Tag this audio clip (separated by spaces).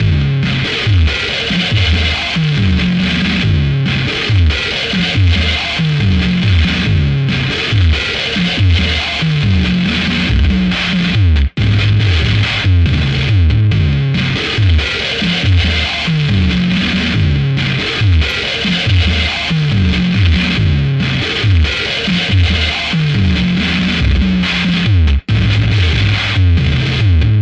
4 75bpm drumloop